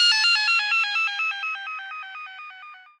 sonokids-omni 06
part of pack of 27 (funny) sounds, shorter than 3 seconds.
abstract, analog, analogue, beep, bleep, cartoon, comedy, electro, electronic, falling, filter, fun, funny, fx, game, happy-new-ears, lol, moog, ridicule, sonokids-omni, sound-effect, soundesign, space, spaceship, synth, synthesizer, toy